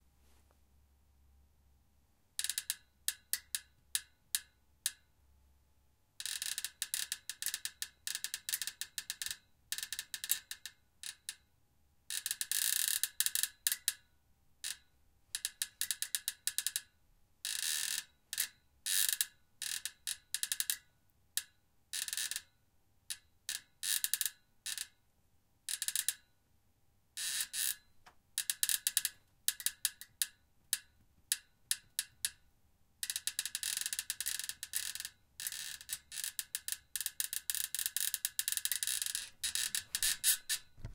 Bed Squeaking 01
A metal frame bed being leant on and sqeaking. Could be slowed down and modified to make cracking sounds.
bed, cracking, creaking, metal, squeak, squeaking